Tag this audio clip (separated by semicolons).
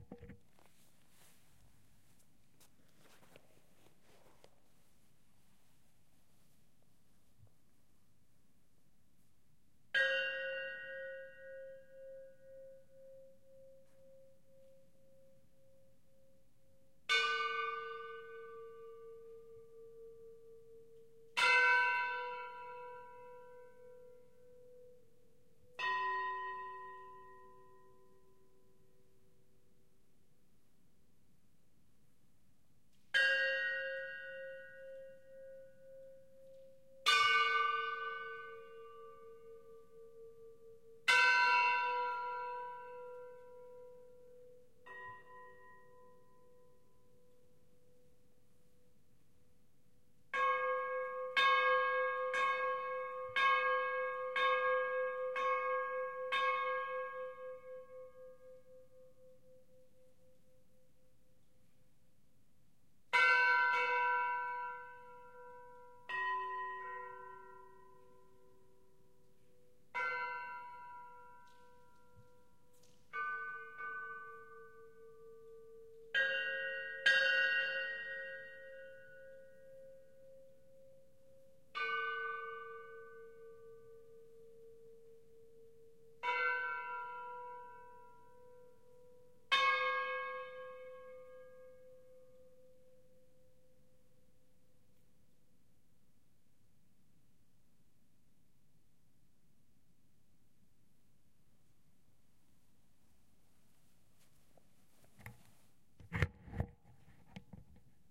church; bells